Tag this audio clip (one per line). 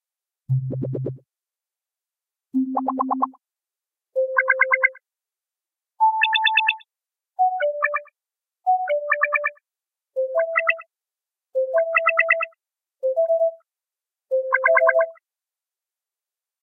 fire
digital
alarm
electronic
futuristic
emergency
fx
alien
alert
sound-design
energy
atmosphere
spaceship
weird
fiction
bridge
starship
warning
hover
future
science
space
sci-fi
signal
noise
call
peep
engine